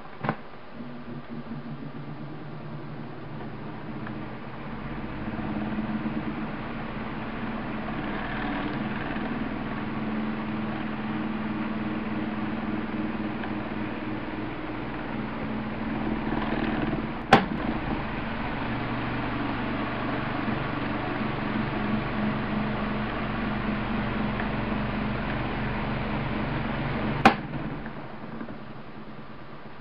house fan2
Recording of a regular standing house fan. Microphone was placed behind fan blades, recording came out pretty clear. (fan is rotating in the recording)
fan, house, standing